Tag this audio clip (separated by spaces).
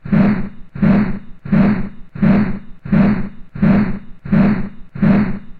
turning swing turn